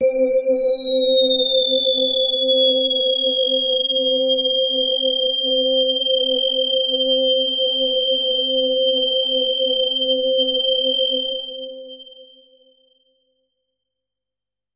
High Resonance Patch - C4
This is a sample from my Q Rack hardware synth. It is part of the "Q multi 006: High Resonance Patch" sample pack. The sound is on the key in the name of the file. To create this samples both filters had high resonance settings, so both filters go into self oscillation.
synth, multi-sample, electronic, resonance, waldorf